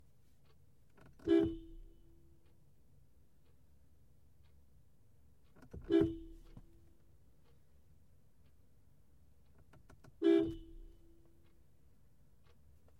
Clip featuring a Mercedes-Benz 190E-16V horn being applied in 3 short bursts. Recorded with a Rode NT1a in the passenger seat, where a listener's head would be.
vehicle, benz, dyno, horn, vroom, mercedes, engine, dynamometer, car